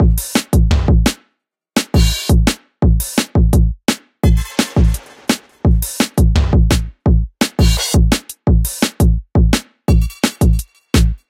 Drum And Bass drum loop extracted from an Ableton project that I chose to discontinue. No fancy effects, just a simple drum pattern with some elements.